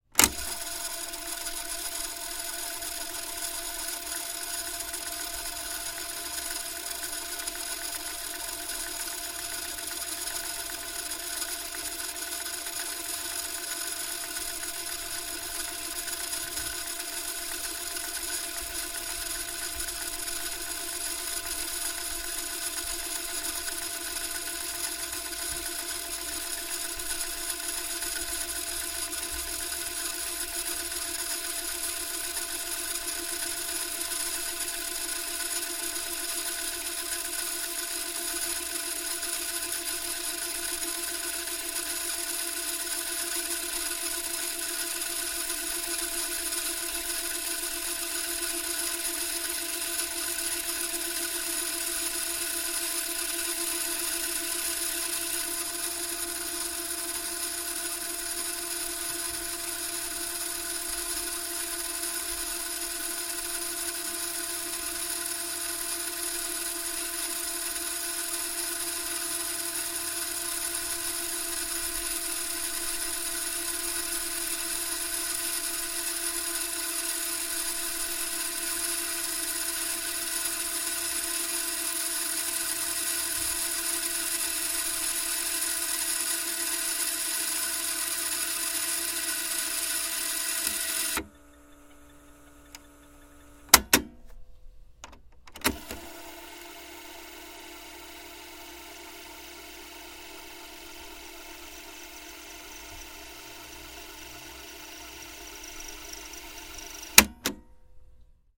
cassette tape deck ffwd full tape +start stop clicks
cassette, clicks, deck, ffwd, full, start, stop, tape